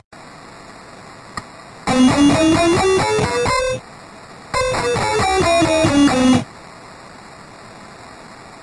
Guitar scale with a 8-bit plugin added.